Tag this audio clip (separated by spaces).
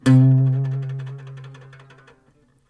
acoustic scale